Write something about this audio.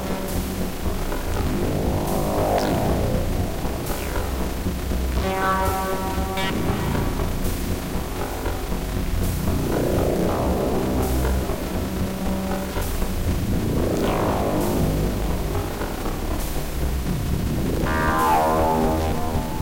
Buchla Mix-3
Cloudlab-200t-V1.2 for Reaktor-6 is a software emulation of the Buchla-200-and-200e-modular-system.
These files are just random sounds generated by the software. The samples are in no standard key and a BPM number cannot be assigned but they may be useful when creating experimental, soundtrack or other types of music.
Reaktor-6, Buchla, Buchla-200-and-200e-modular-system, 2